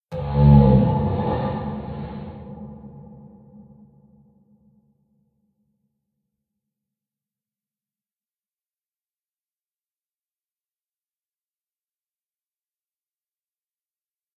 This pack of 'Monster' noises, are just a few recordings of me, which have lowered the pitch by about an octave (a B5 I think it was), and then have processed it with a few effects to give it slightly nicer sound.